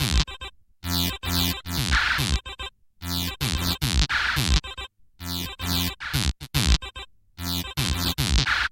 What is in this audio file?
8bit110bpm-12
The 8 Bit Gamer collection is a fun chip tune like collection of comptuer generated sound organized into loops
110, 8bit, 8, bit, bpm, com, loop